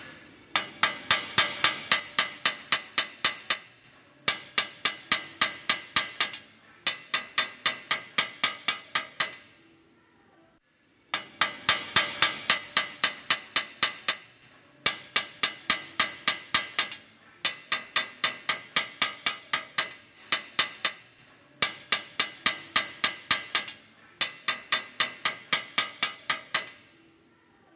Hammer taps metal 1
Tapping metal component with a hammer
Factory, Metalwork, Industrial, field-recording, Metal